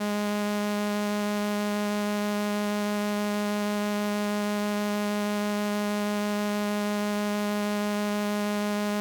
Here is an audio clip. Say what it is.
Transistor Organ Violin - G#3
Sample of an old combo organ set to its "Violin" setting.
Recorded with a DI-Box and a RME Babyface using Cubase.
Have fun!
70s, analog, analogue, combo-organ, electric-organ, electronic-organ, raw, sample, string-emulation, strings, transistor-organ, vibrato, vintage